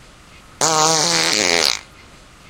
loud stinker
fart poot gas flatulence flatulation explosion noise weird space
weird, flatulation, gas, poot, noise, flatulence, fart, explosion, space